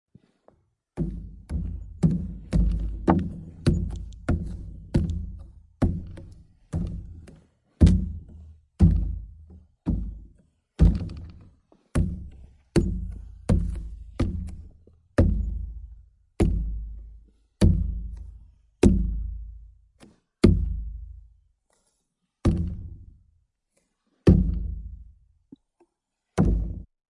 boat footsteps soft
Meant to be footsteps on a boat, it's actually just pounding boots on a five-gallon plastic jug of water. This is a softer version.
5gallon, boat, deep, fiberglass, floor, foley, footstep, footsteps, gallon, handling, jug, plastic, step, steps, walk, walking, waterbottle, waterjug